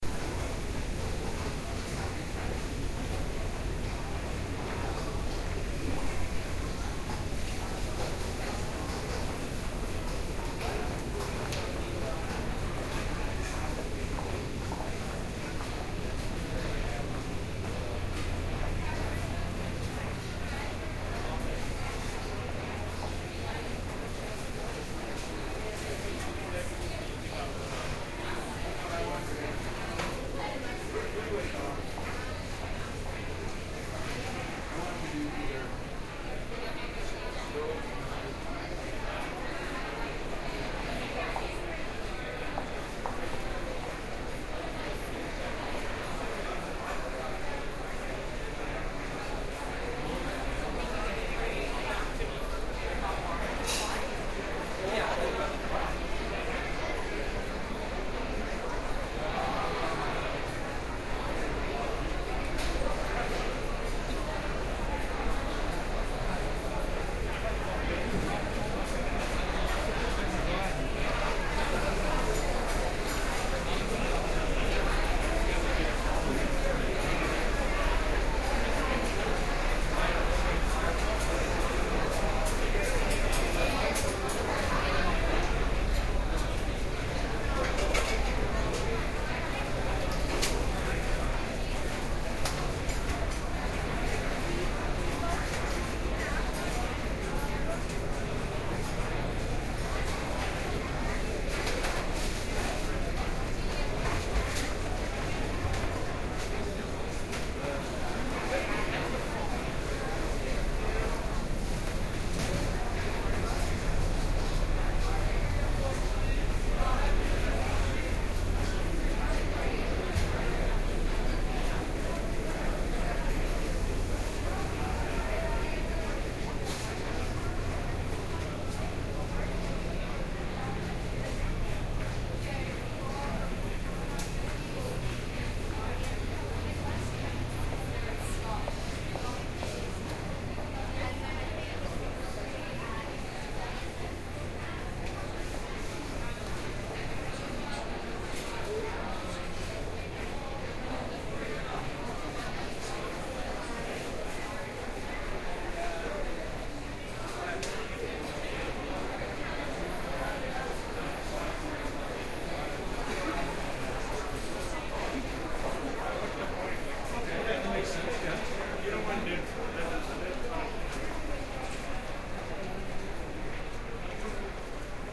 Busy food court during office hours at a crowded mall. Stereo binaural.